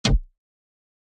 sound var 12
snaree, clap, sfx hit percussion one-shot percs perc percussive